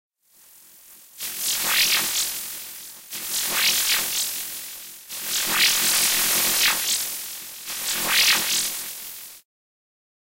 corto circuito
Arcade, circuito, Commodore, Corto, Lo-Fi, Robot, Speech